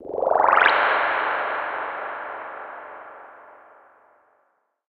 uplift waterdrops effect with reverb